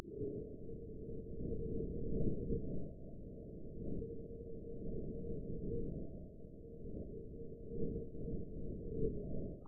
Lonely Winter Breeze
I took a notepad and flipped the pages, turned the pitch down
breeze, cold, winter